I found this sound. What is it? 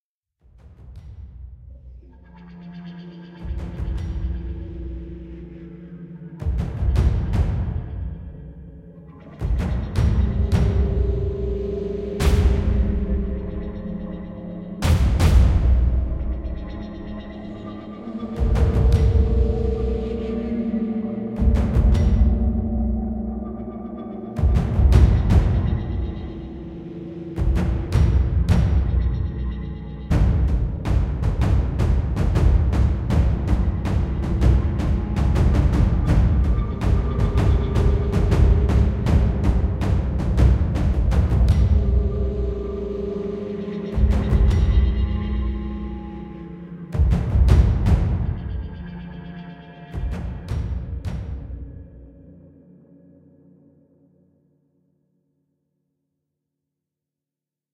Scary Cinematic sound and drums
I used tweaked the scary sound and added drums for more tension building. Done in Music Studio.
Cinematic, drums, freaky, horror, Scary, tension